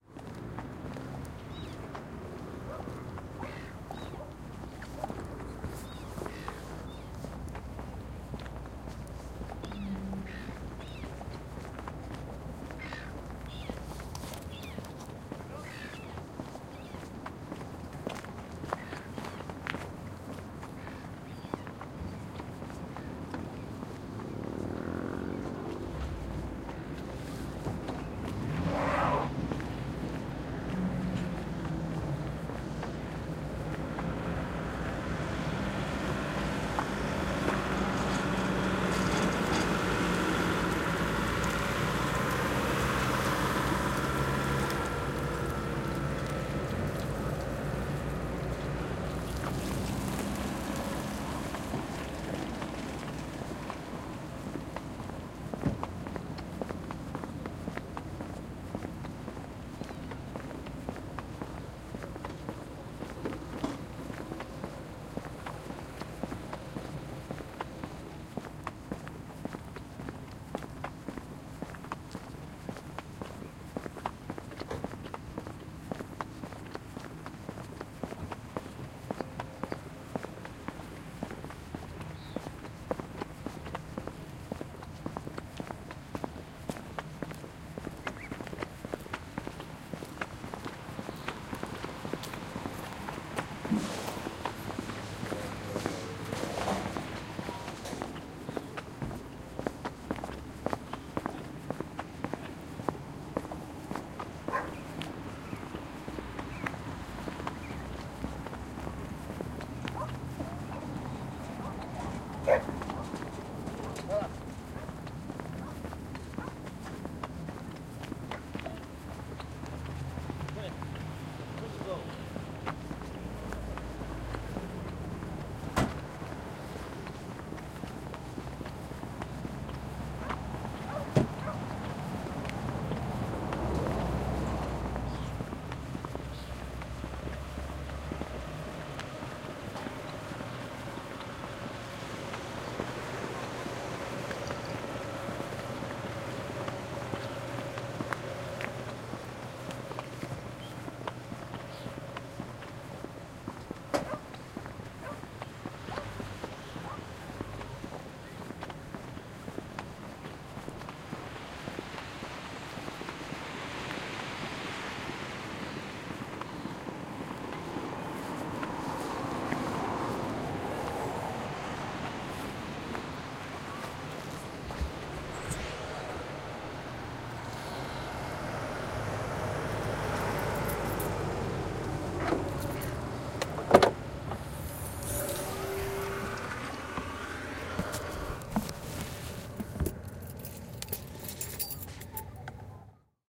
Boat Ramp - Walk to car
Nothing special. This is just the walk as shown by the green path in the comments link (the start-point is at the water, upper left). Listen and you can hear as we pass the building at the second point in the path and then into the car-park where people are loading/unloading dogs, it's a popular place to take the dog for a run. The geotag shows the end-point.
boat, boat-ramp, car, diesel, dog, field, field-recording, foot-steps, recording, sea, stereo, walking, water